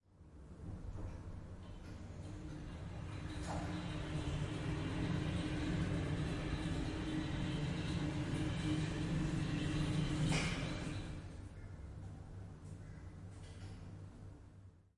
elevator travel 7a
The sound of travelling in a typical elevator. Recorded at the Queensland Conservatorium with the Zoom H6 XY module.